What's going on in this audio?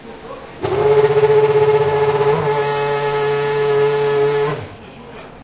F1 BR 06 Engine Starts 7
Formula1 Brazil 2006 race. engine starts "MD MZR50" "Mic ECM907"
accelerating, pulse-rate, racing, engine